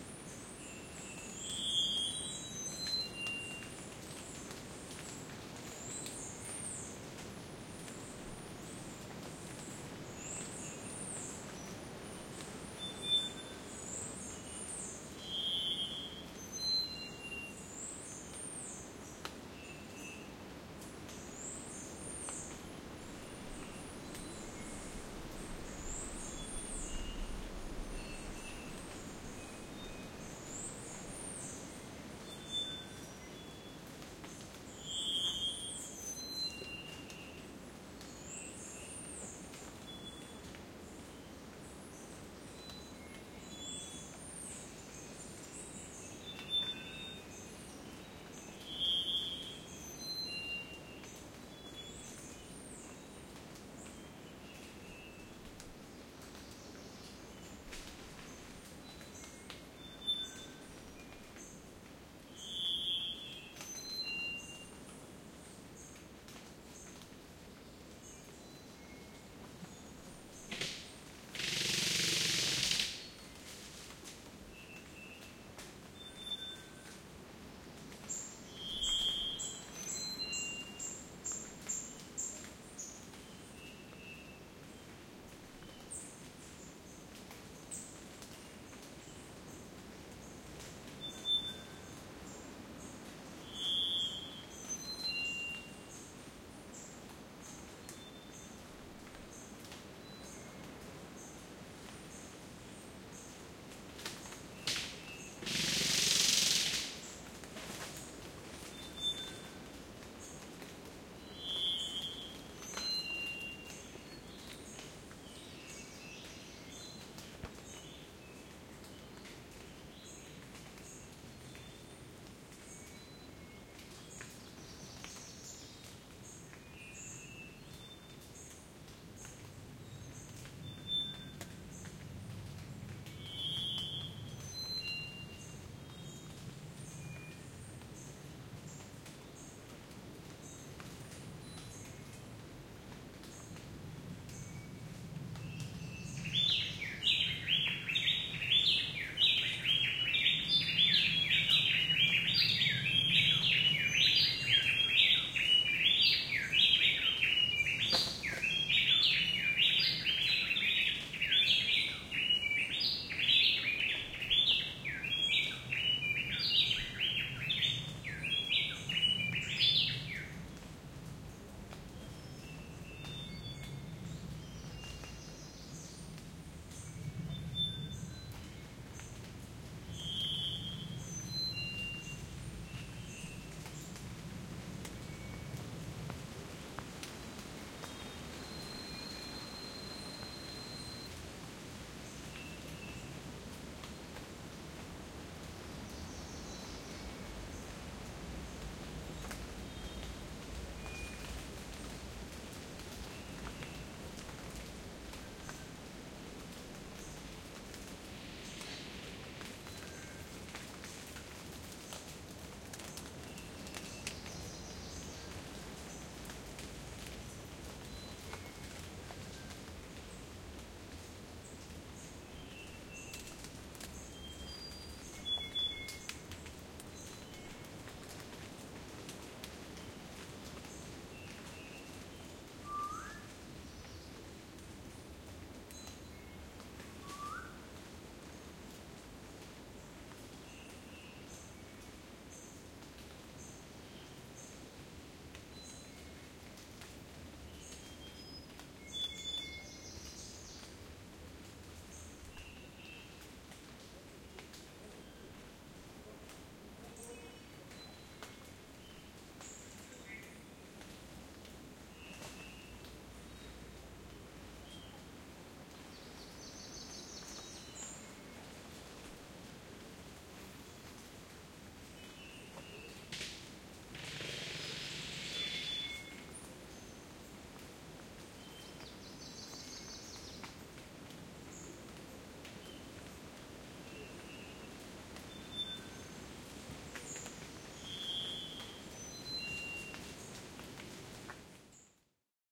cr cloud forest 04
An ambient field recording in the Monteverde Cloud Forest Reserve. Lots of birds and rain and general cloud forest sounds. Recorded with a pair of AT4021 mics into a modified Marantz PMD661 and edited with Reason.
forest, nature